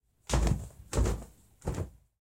43.Águila se para en la estatua
aguila deteniendose de su vuelo para pararse en un objeto